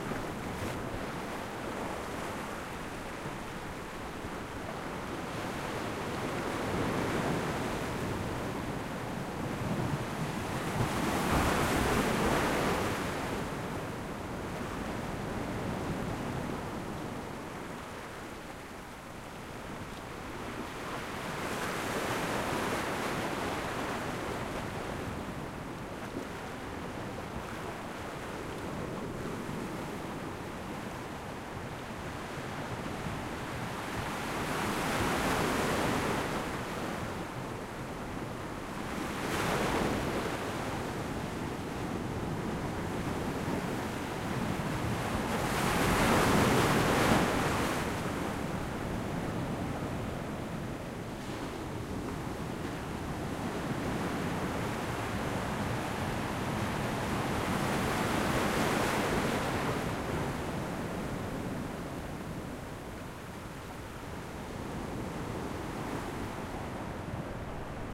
Ambience EXT day beach medium waves 3 (estoril portugal)
waves, beach, zoom, portugal